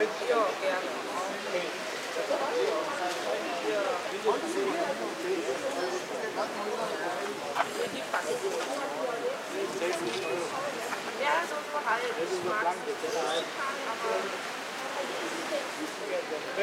sound of a german flea-market;
you can loop it
talking, people, flea-market, german, public, restaurant, many, cafe, crowd